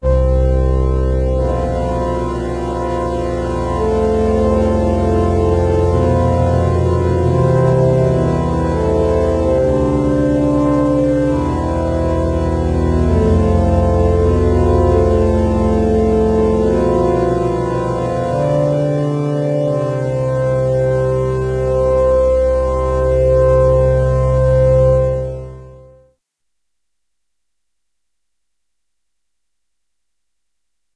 Random notes played on a church organ simulator. Starts rough but ends pleasantly.
catholic, church, mass, prayer, organ, pipe-organ